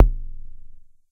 just a kick